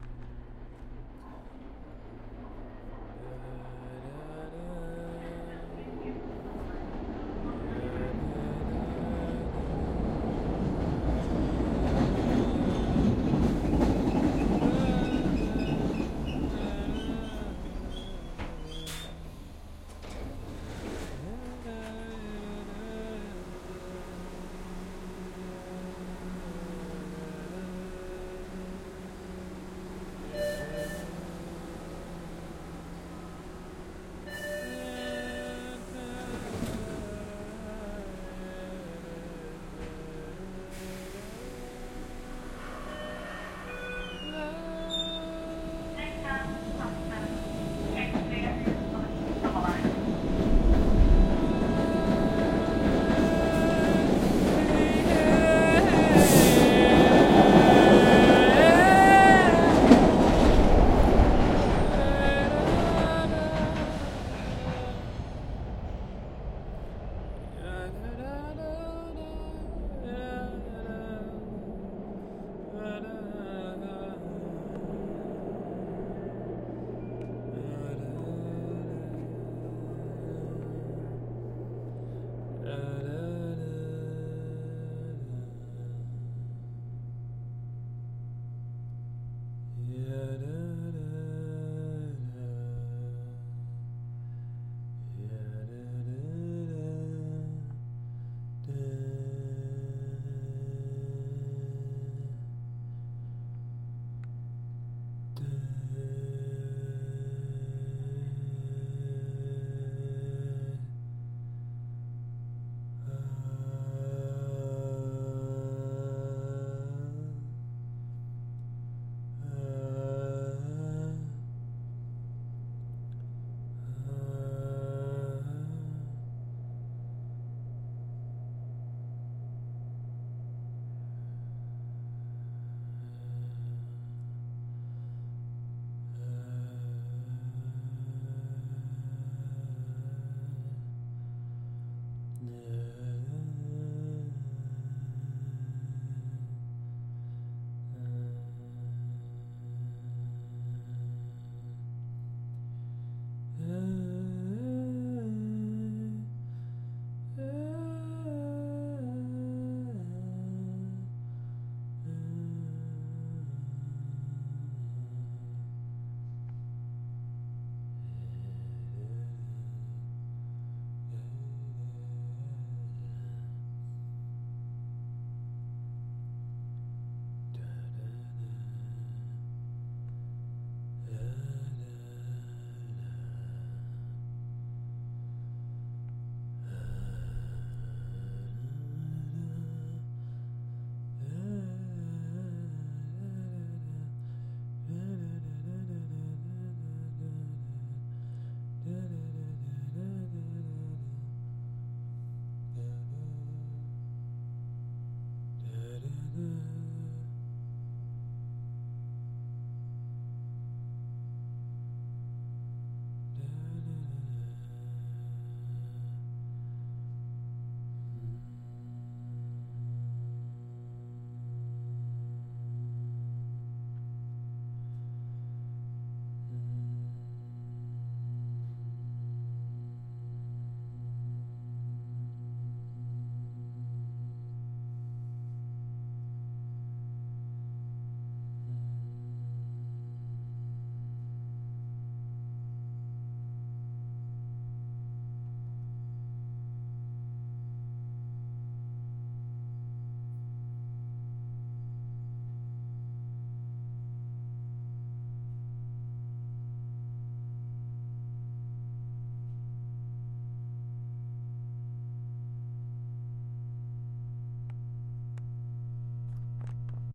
Singing with the station
A man singing with the sounds of the on coming train/ subway car, and mumbling along with the naturally amplified sound of the over head fluorescent lamps.